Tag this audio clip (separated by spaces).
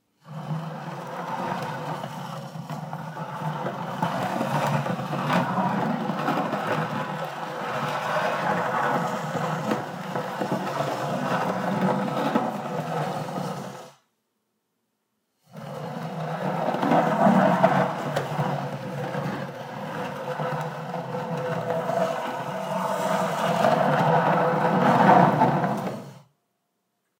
chair floor scrape slide wooden